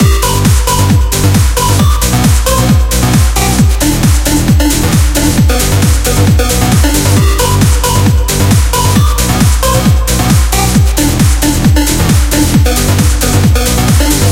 A full loop with video game sounding synths with modern sounding dance music. Perfect for happier exciting games. THANKS!
happyhardcore, tim, sega, trance, videogame, 8bit, music, synth1, v-station, palumbo, mario